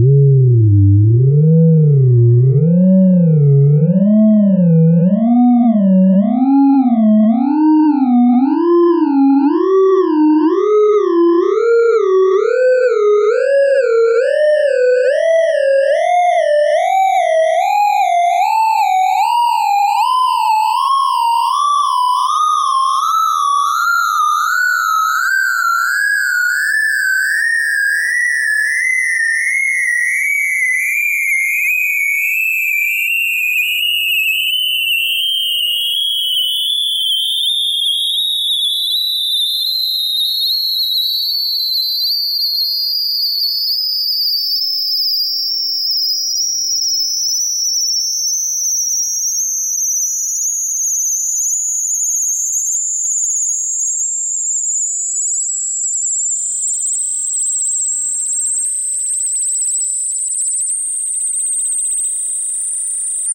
Sine wave interpretation of a reactor melting down as the panic rises.